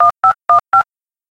Sound of someone dialing a cell phone. Created for a production of Sideways Stories from Wayside School. Created using this sound: